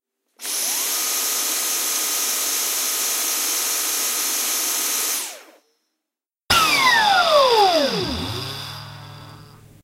The noise a vacuum makes as it's on and working. Recording by using my own vacuum cleaner.
This extra noise at the end is what happens if I press the on button quickly enough that I don't let the vacuum turn on completely. The motor makes a long rev-down sound.
This sound is part of a college project, but can be used by anyone. Do take note of the license.